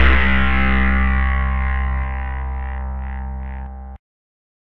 A acid one-shot sound sample created by remixing the sounds of
acid one-shot tb 303 synth